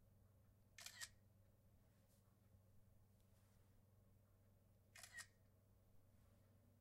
An iPhone default sound of taking a picture.
photo, camera, iPhone, picture, cell-phone, click, photography